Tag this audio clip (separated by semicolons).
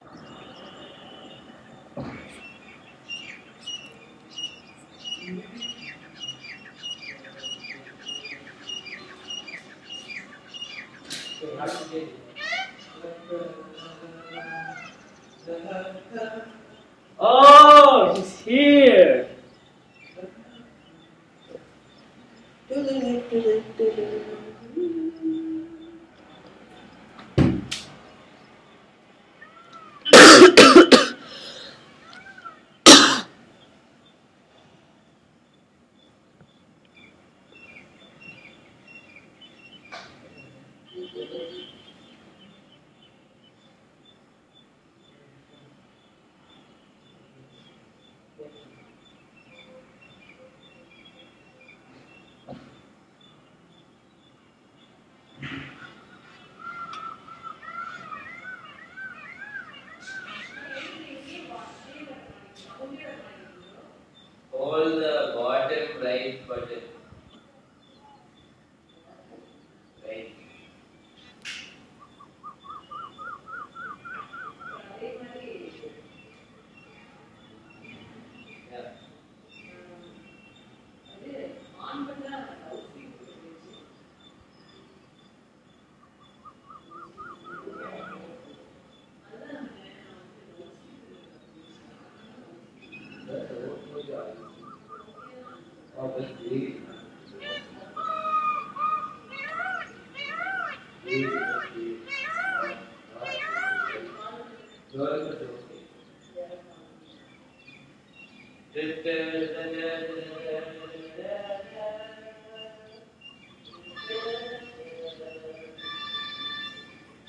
humming; coimbatore; terrace; birds; ambience; traffic